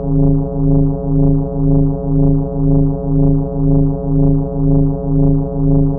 Electric background, where you can hear a very similar motor with electromagnetic noise interference.